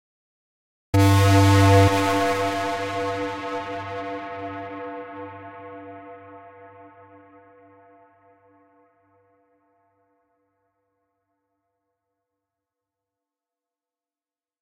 Techno Horn 1
Heavy dark distorted Techno Horn with industrial feel.
Bass, Blast, Creepy, Dark, Dark-FX, Deep, Distorted, Distorted-Reverb, Distortion, FSX, FX, Heavy, Horn, Industrial, Minimal, Reverb, Reverb-Blast, Reverb-FX, Techno, Techno-Blast, Techno-Horn, Techno-Reverb